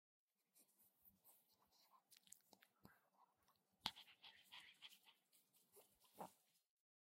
20170520 Chihuahua Dog Breathing and Licking 1
Chihuahua Dog Breathing and Licking, recorded with MXL Cube -> Focusrite 2i4.
animal, breathing, chihuahua, dog, lick, licking, pet, pets